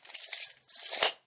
I am recorded the sound when autorolling meter spining the unwounded part of the meter back.
autorolling-meter, meter, spinning, rolling